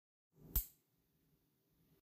snapping some tongs together